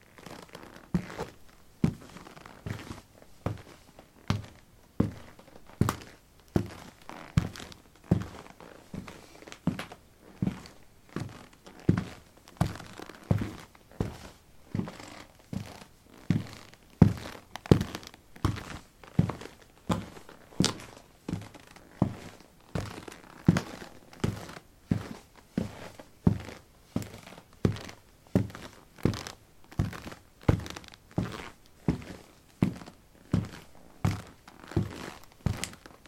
Walking on concrete: trekking boots. Recorded with a ZOOM H2 in a basement of a house, normalized with Audacity.